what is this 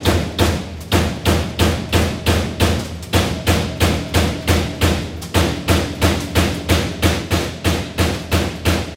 die, industrial, machine, factory, field-recording, metal, processing
die, factory, field-recording, industrial, machine, processing